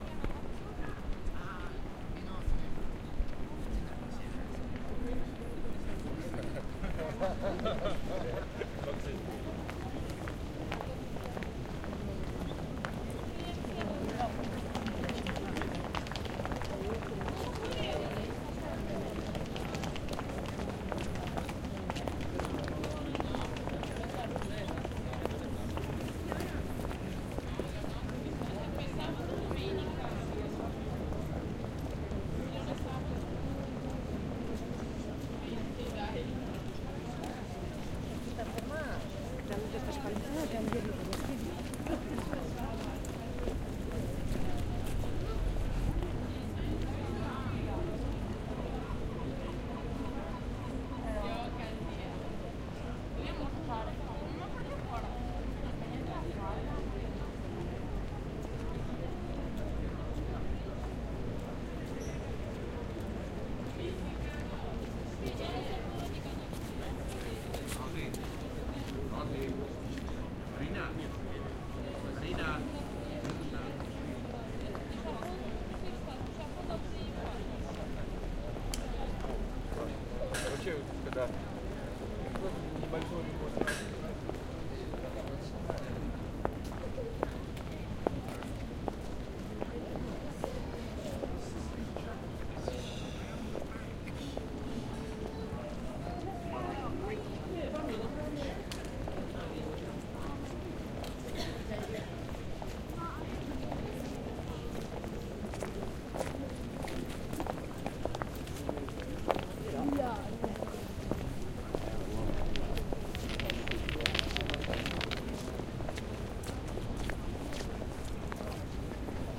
STE-038 vatican walkway
Standing outside of St. Peter's Basilica near the exit, waiting for someone. Many people pass by including a lady with a rolling suitcase.